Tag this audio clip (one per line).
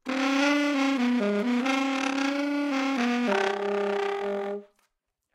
blues
smith
howie